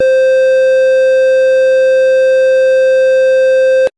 LR35902 Square C6
A sound which reminded me a lot of the GameBoy. I've named it after the GB's CPU - the Sharp LR35902 - which also handled the GB's audio. This is the note C of octave 6. (Created with AudioSauna.)
synth, chiptune, square, fuzzy